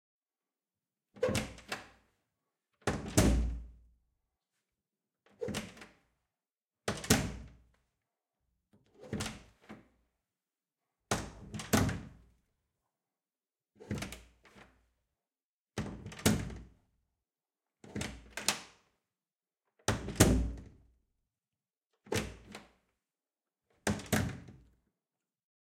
Wooden door 1, opening and closing with different speeds and articulations
DOOR #1
Opening and closing an old wooden kitchen door in a old 1920's workers' settlement building.
Different speeds and articulations from soft to hard to match the tone to whatever is needed.